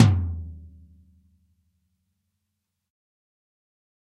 Dirty Tony's Tom 14'' 056
This is the Dirty Tony's Tom 14''. He recorded it at Johnny's studio, the only studio with a hole in the wall! It has been recorded with four mics, and this is the mix of all!
14, 14x10, drum, drumset, heavy, metal, pack, punk, raw, real, realistic, tom